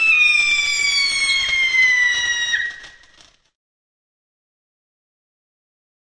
Whistling Firework
The high-pitched "whistler" of a firework, recording on Guy Fawkes night 2020. Intended as a layering sound effect. If lowered in terms of pitch or rate, it makes for a convincing "incoming mortar sound".